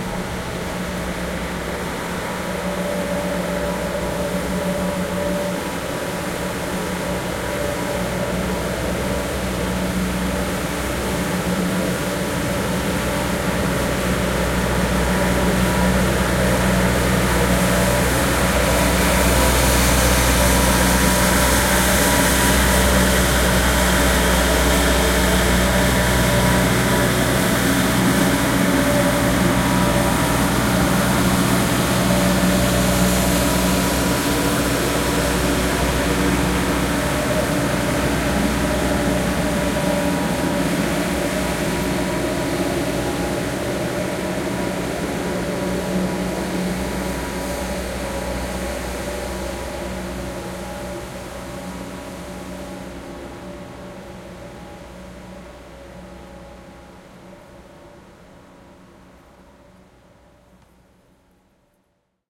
a street cleaning car in Kiel-Gaarden (Germany)